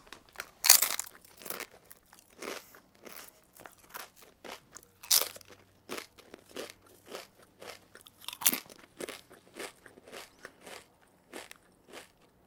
eating chips
sound, class, intermediate